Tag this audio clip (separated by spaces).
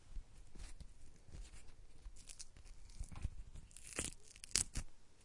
beef-jerky dry rip tear